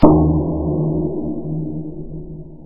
canopial brain
This sound derives from a can being opened.
fx-sound, hit, metal, space